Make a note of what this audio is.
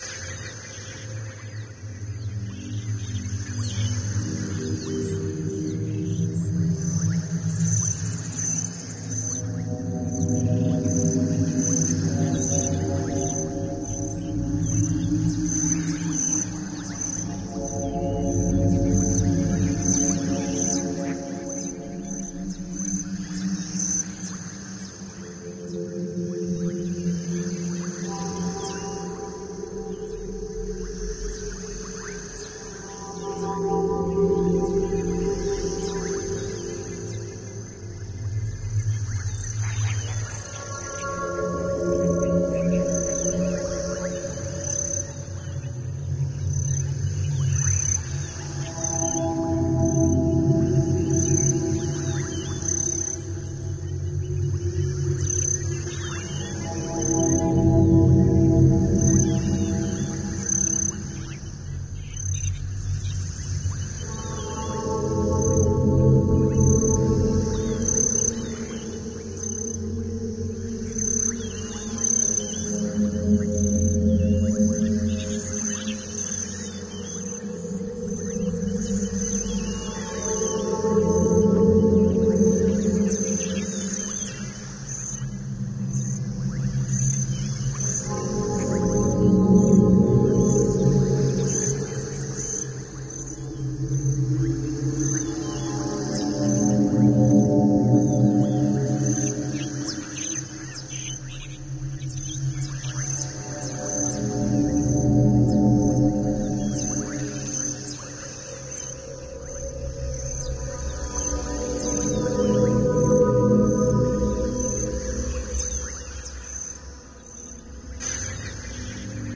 Myst Forest Drone Atmo Dark Fantasy Cinematic